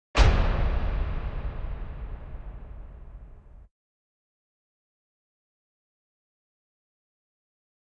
reverbed impact
A processed and reverberated sound of a door/gate being locked
bass, impact, lock, reverb